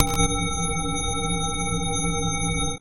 Long Ding 2
An even longer version of this sound:
Courtesy of KT Granulator.
processed, stretched, dare-26, KT-granulator, image-to-sound, granulat